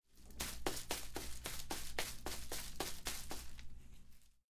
pasos, correr, grama
correr, grama, pasos